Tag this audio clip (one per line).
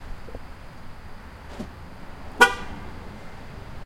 car
honk
driving